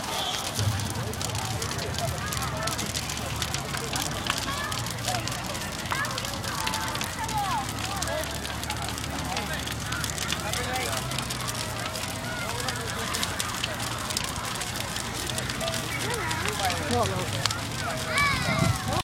A big fire at a pub's bonfire/Halloween party. Rotherham South Yorkshire UK 31 Oct 2014.